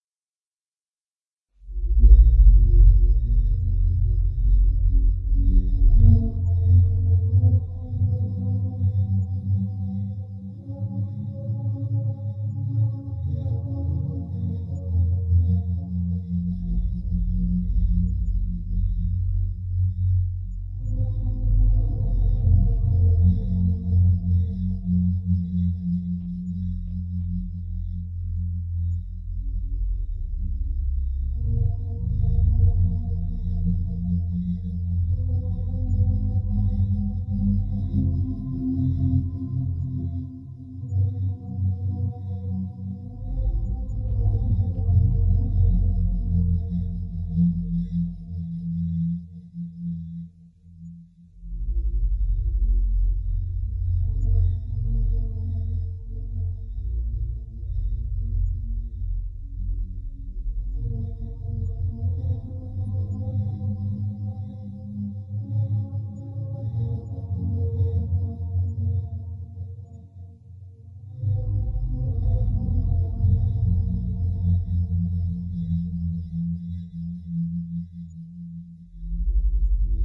Made with Aeterial VST.
2 oscillators, reverb, chorus sfx.
field-recording, black, cavern, space, star, dark, melancolic